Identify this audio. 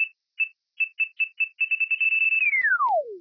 Sound of something from somewhere as best i can remember. Seems like it is the sound of a claymore or the like, in some game; by the time you hear it you know you are going to die. I messed with it at the end but you can cut that off if you want. No explosion at the end.
speed, proximity, beep, cod, claymore, alarm, tripped, warning, alert, up, grenade